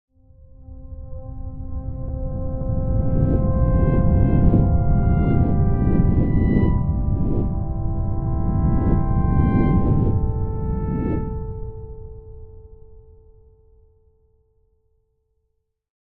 Asteroids whizzing by, enhanced by soft synth notes.
asteroid, sci-fi, atmospheric, melodic, space